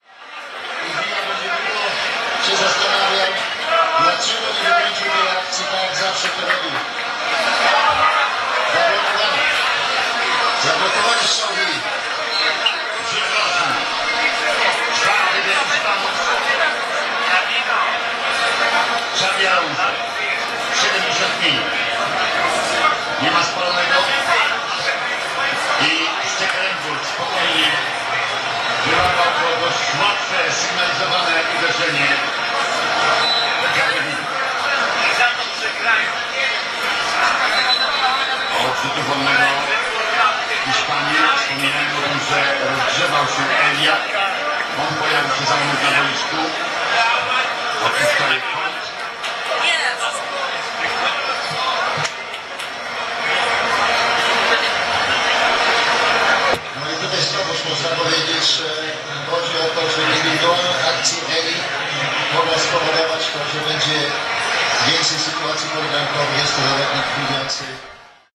75 minut hooland spain match110710
11.07.2010: between 20.30 -23.30. in the beer garden (outside bar) on the Polwiejska street in the center of Poznan in Poland. the transmission of the final Fifa match between Holland and Spain.
poznan, beer-garden, poland, holland-spain-match, transmission, fifa, noise, voices, fans, people, field-recording, vuvuzele